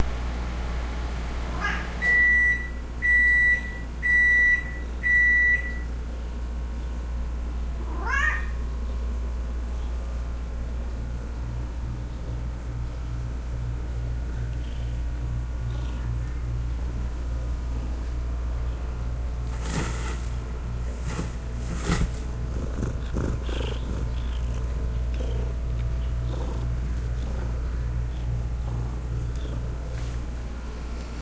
SonyECMDS70PWS cat microwave pump
cat, digital, electet, field-recording, microphone, microwave, test